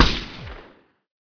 A mixed sound of a gun firing
laser, shot, remix, gun